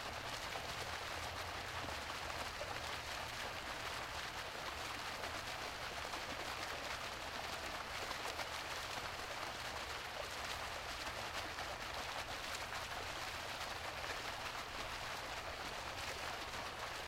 water pool stream2
working on some water sound fx
fall, stream, water, pool